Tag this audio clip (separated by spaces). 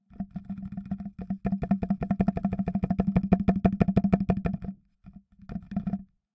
c4 delphi pipe pipes plastic pvc rubber s1 s4 spring string